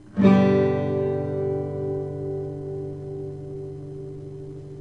used TAB: 300023(eBGDAE)